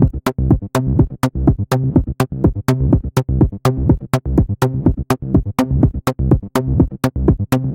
Groove 8-Audio
Made in Ableton with various synths and effects. groove synth fat layer beat phat 124bpm